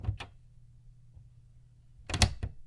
Dresser door 3
Bedroom, field, recording, Wood